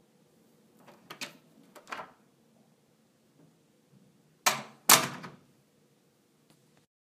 Door Open Close
Opening and closing a door with a mild shut.